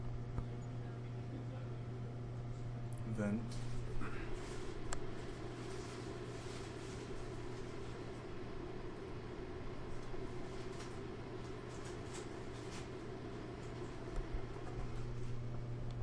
Vent- It was in a long hallway where the walls were close together. In the hallways that the vent was in there were many offices near by where you could also hear keyboard clicks coming from the offices. Stereo recording on a H2 digital recorder